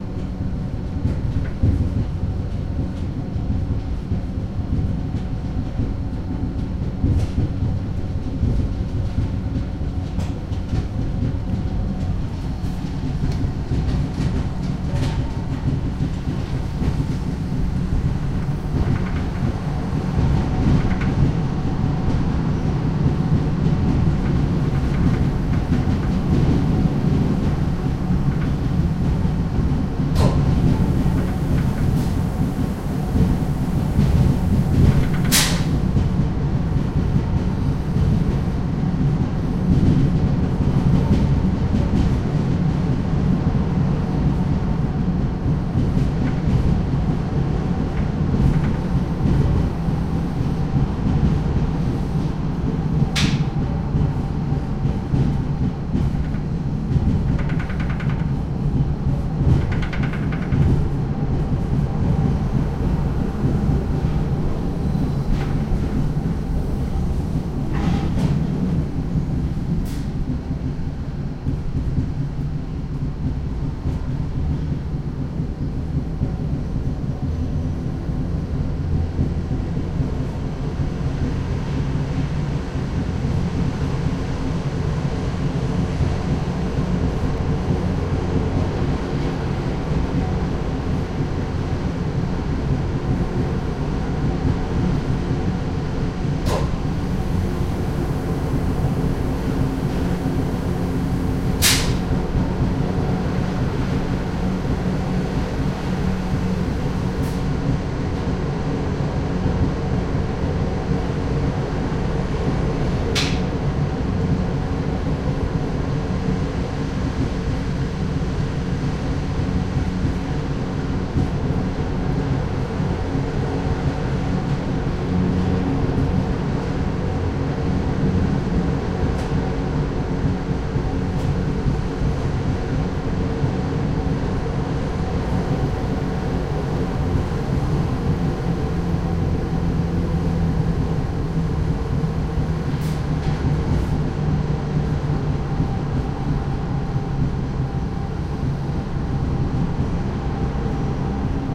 inside the 'cremallera' of núria, a little train climbing the Pyrenees mountains
more quiet take